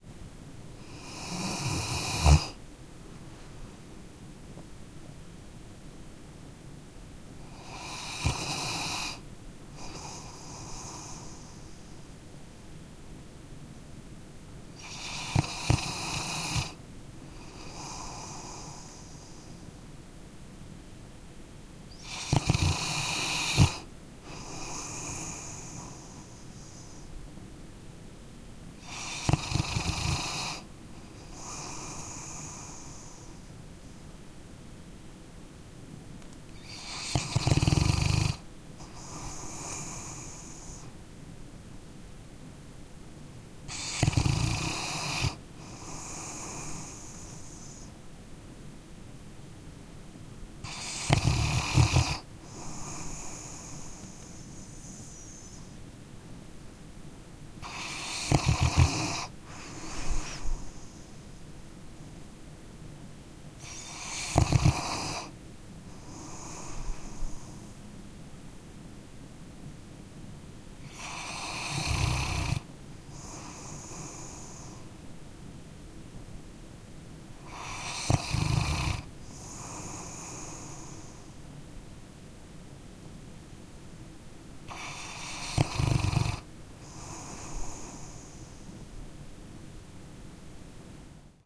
Dog is snoring 20130424T1140
My dog (Atlas, a labrador retriver), almost 11 years old, is sleeping (and snoring!) on the floor in the kitchen. Recorded with my Olympus LS-10.
dog, sleeping, snoring